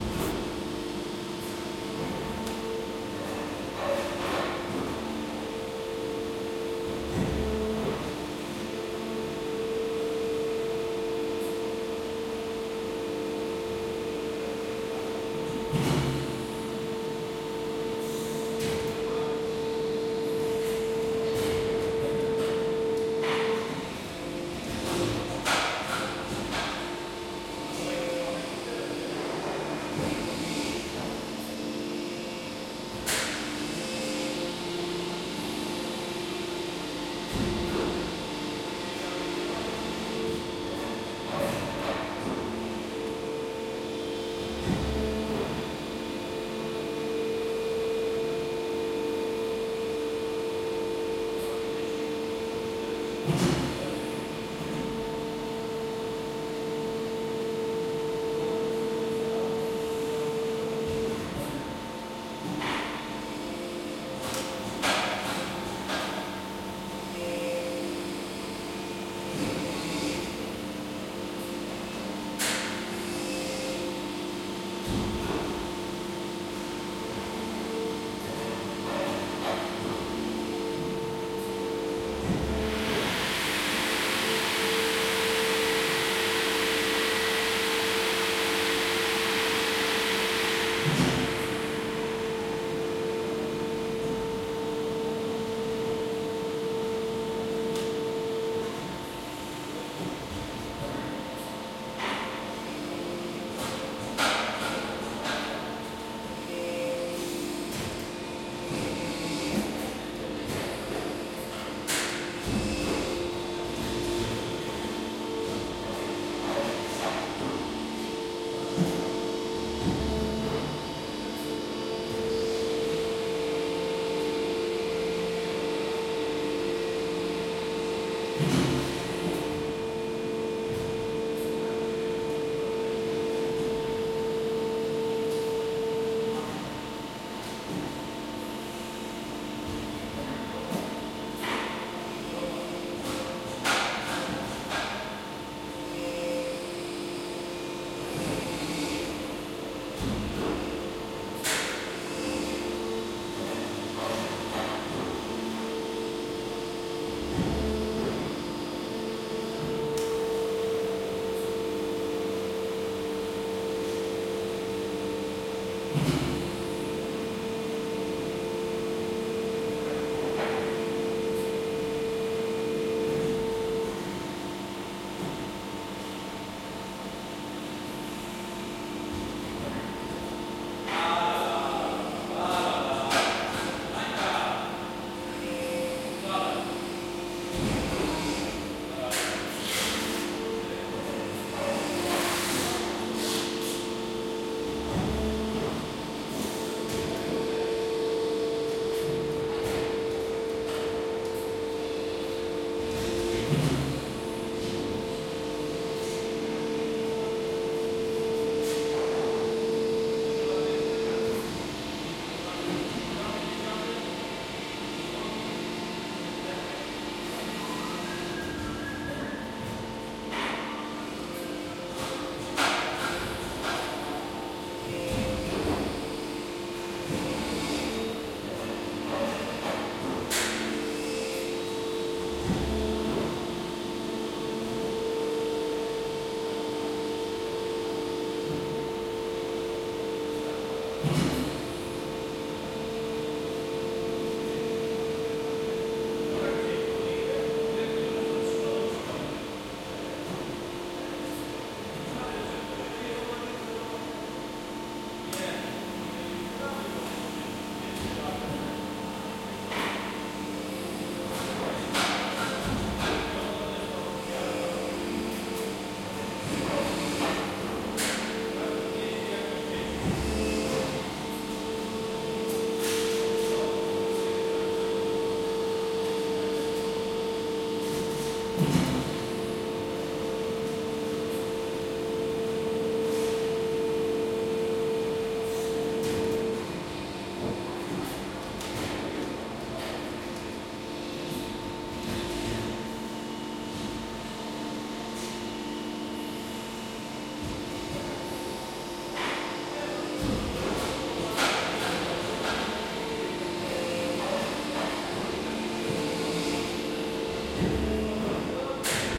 industrial hall plastic processing modeling injection machine